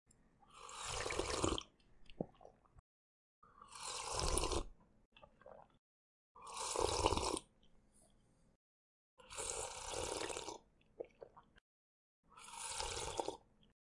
Drinking Tea (5 Versions)
Drinking tea sound effect, with 5 different versions.
Hope you find it useful!
beverage, coffee, cup, drink, drinking, liquid, sip, sipping, slurp, tea, water